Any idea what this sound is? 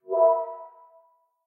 Flourish Spacey 2
kind of a reveal type space sound with a mid range rounded sound
button, click, feel, fi, mid-range, quick, sci